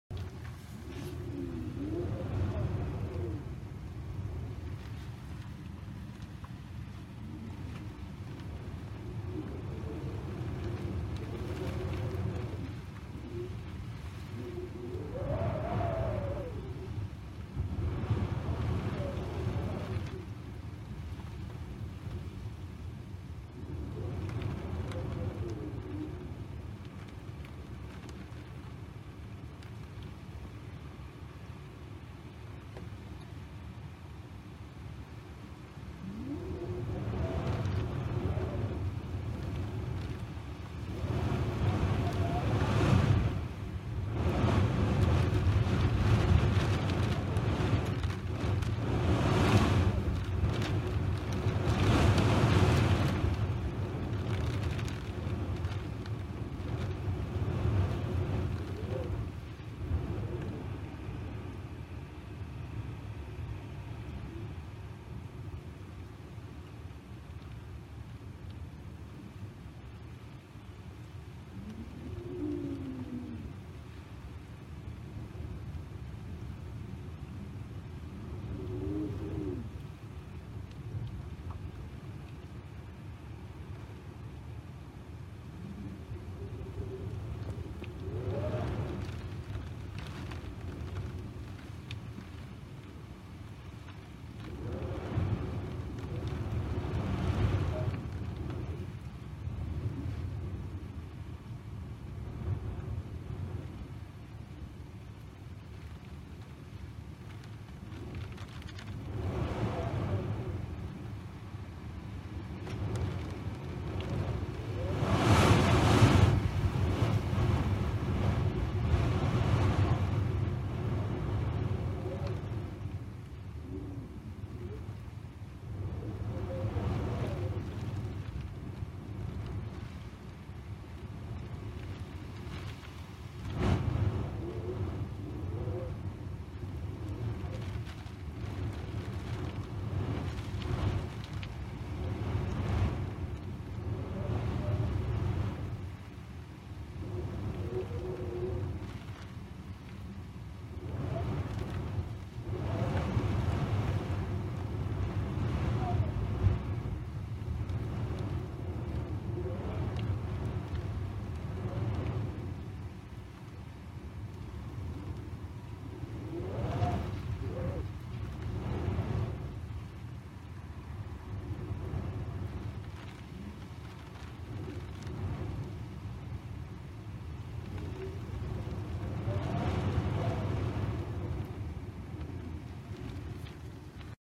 Old window gusty wind
A recording of wind and rain at an old window. The wind is gusty and is whistling through the gaps. Could create a a great creepy background atmosphere.
spooky-atmosphere, old-window, wind, windy, creepy-vibe, winter, gust, whistling-wind, weather, gusts, cold, isolated, gale, bleak, storm